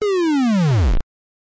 boom sine tenor
Sine boom made by unknown recordings/filterings/generatings in Audacity. From a few years ago.
Boom, Fall, Sine, Tenor